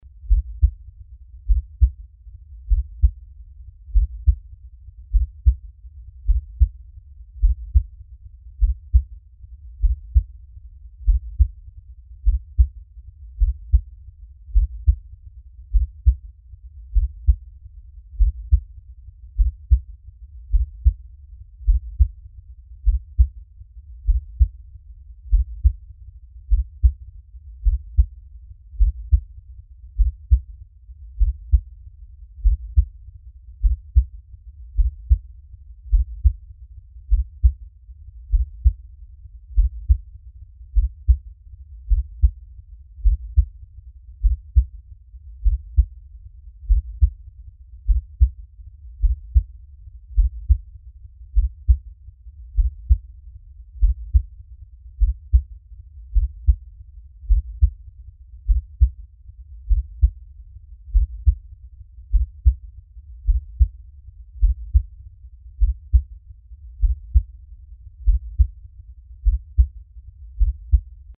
My heartbeat recorded using a Shure dynamic microphone and Adobe Audition. Sounds very dark, my sunblind vibrates when I'm turning the volume up far enough :D
human, heartbeat, nature, heart, tension, hard, bass, beat